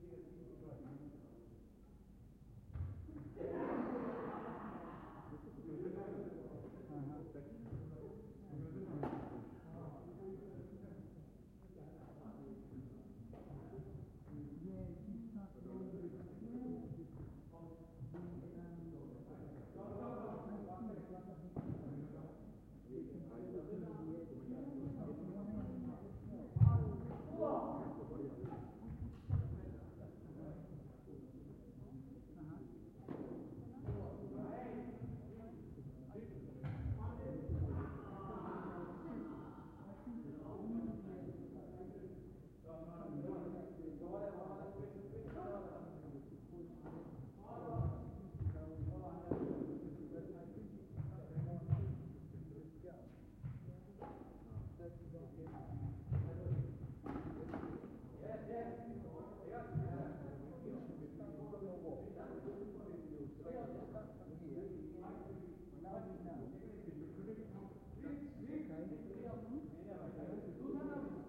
People playing badmington indoors in the local sports centre.
game, gym, sport, shouting, badmington, sports-centre